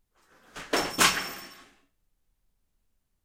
Big crash ambient 3
This sample is a crash of plastic and metal stuffs. Recorded with two condenser rode microphones and mixed with soundtrack pro.
(6 channels surround!)